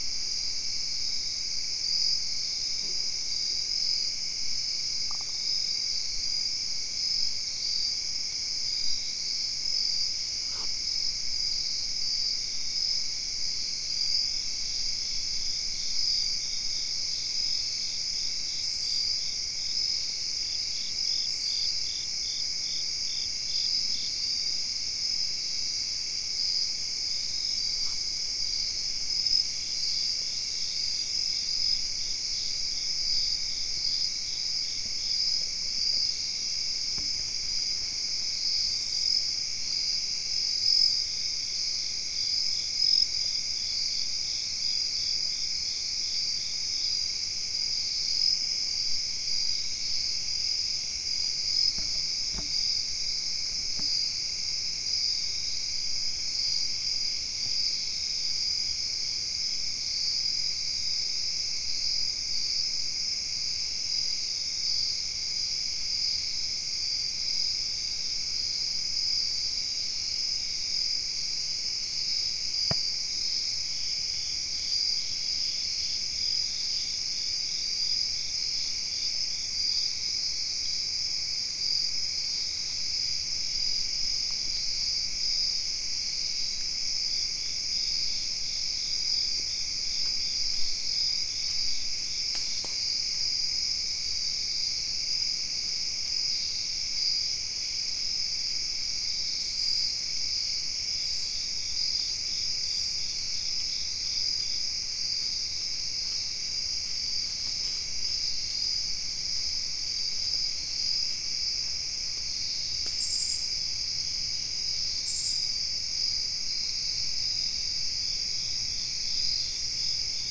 Forest Evening #3
Stereo field recording taken at 7PM EST deep in the woods of rural North Carolina on the eastern seaboard of the United States. Largely free of human sounds.
birds, nature, field-recording, insects, ambiance, breeze